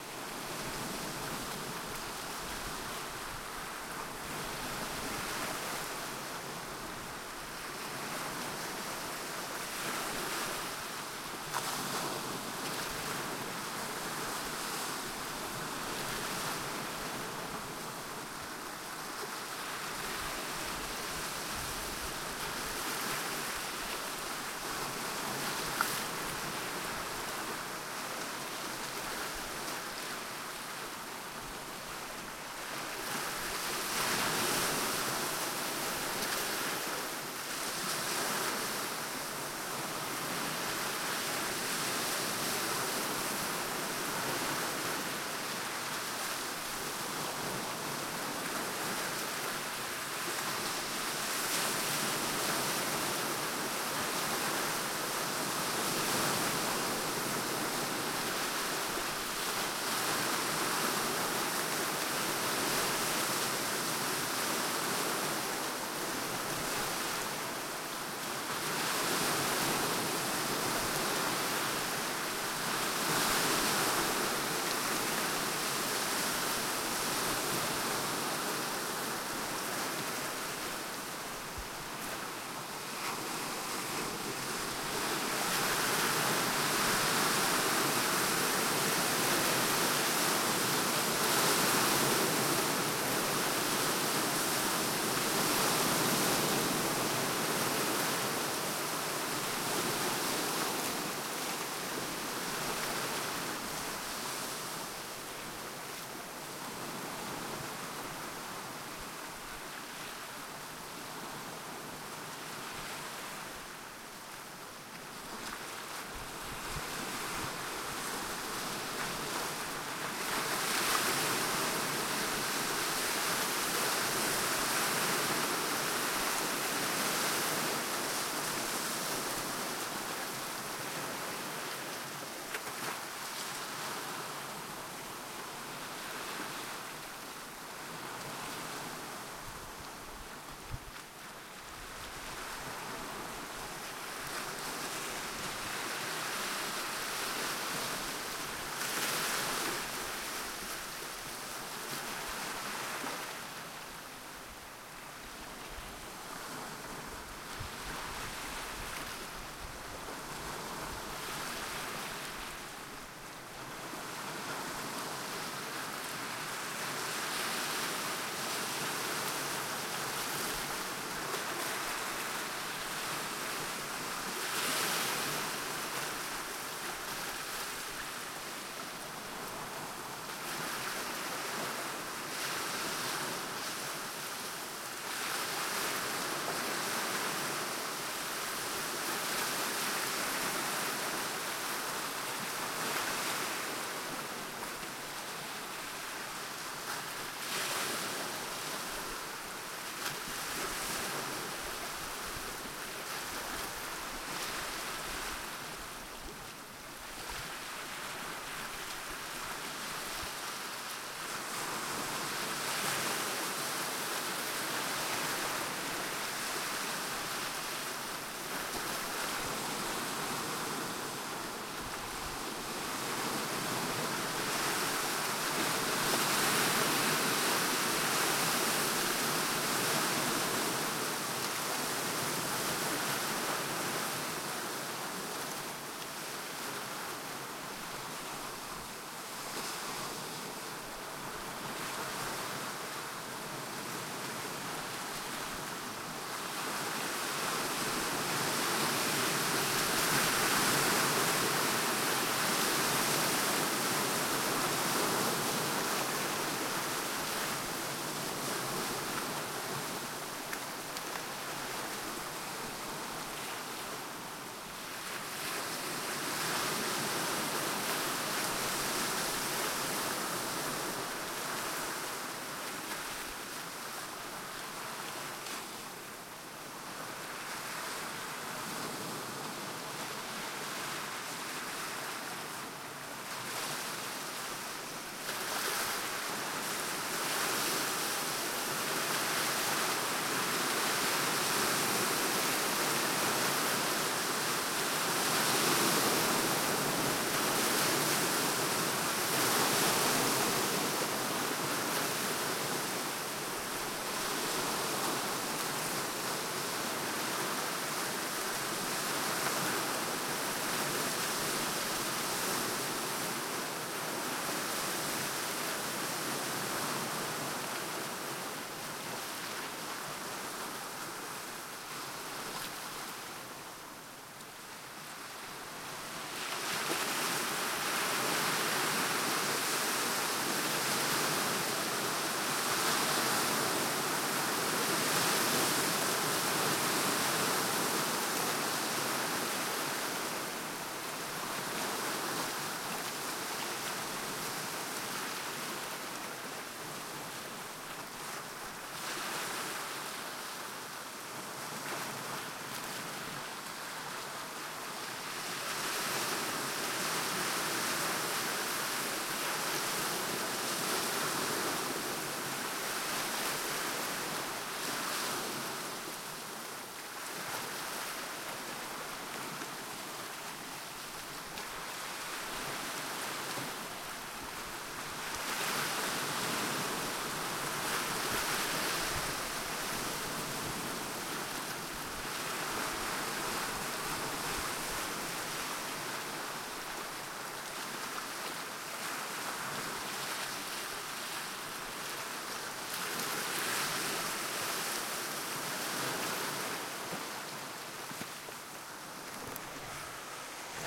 sea surf, baltic sea
Sea surf on the west coast of the swedish baltic sea. Tranquil and sunny day at sundown. Recorded on Zoom H2.
surf, seaside, shore, coast, beach, sea, ocean, waves, water, splash